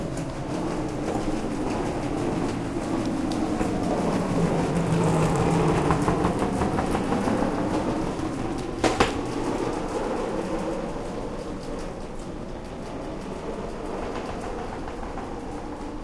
rolling bag
this sound is a continuing sound that pans from right to left of a rolling suitcase in an open school hallway.
ambience, bag, drag, drone, hum, low, scrape, ship, space, sustain